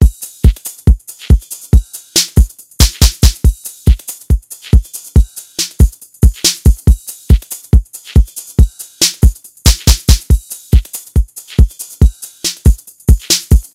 house drum sample